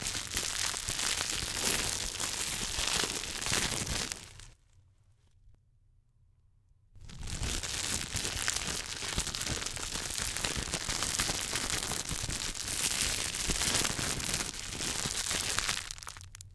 Crunching Sound
This is my first sound I've done. What I use to make the sound was a plastic ziplock bag and just crunching it around.
cracker, crunch, crush